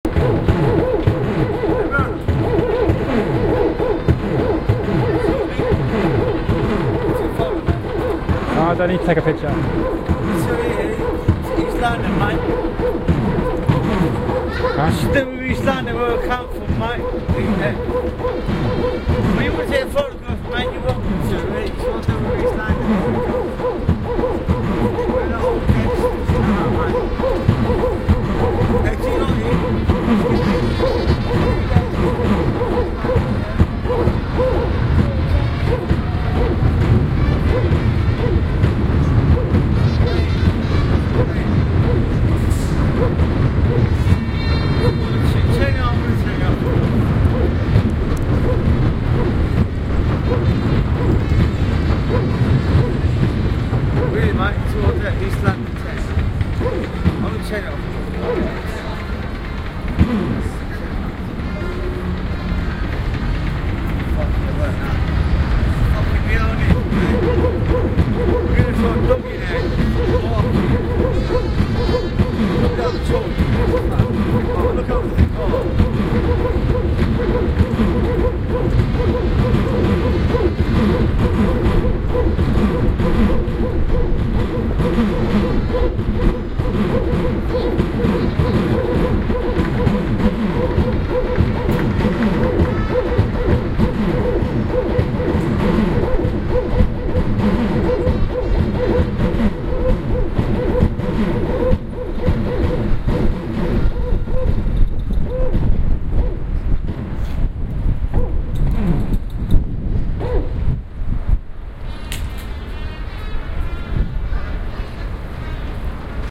Waterloo, installation at south bank